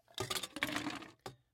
Dump Ice Cubes FF293
Ice cubes being dumped, almost a crunching sound. Tumbling ice cubes bumping into each other.
Dumping-ice, Ice-cubes, Ice-cubes-falling